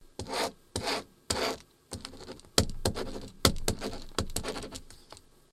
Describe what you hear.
E3 pen office
listen to a pen writting on the surface of a paper
paper, pen, writting